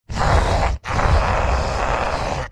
A large Monster voice created using layers at different pitches, and formant variation.
creature, growl, processed, beast, noises, beasts, creepy, scary, monster, growls, creatures, horror